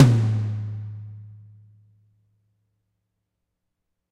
this is tom sample of an 16" floor tom which i was messing around tuning to give different brightnesses and sustains
recorded with an sm57 directly on it and edited in logic
beat, tom, hit, sample, low, long